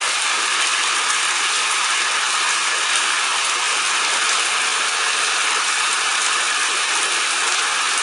COLIN Nina 2014 2015 raining
I recorded this sound of 00:08 seconds with my camera. I took the sound of the rain from outside. I separated the sound from the video. After that, I copied the sound two times, then I put one on the right channel and the other on the left channel. And I mixed it together.
I used different effects to make the sound intense:
Noise reduction
Tempo: -10
Adjustable fade : linear out
Reflection
Normalize
//Typologie de Schaeffer:
X- Continu complexe & V’ Impulsion variée
//Morphologie de Schaeffer:
Masse: son cannelé
Timbre harmonique: éclatant
Grain: de frottement - rugueux
Allure: absence de vibrato
Dynamique: attaque abrupte
Profil mélodique:variation serpentine
Variation serpentine
Calibre: presence de filtrage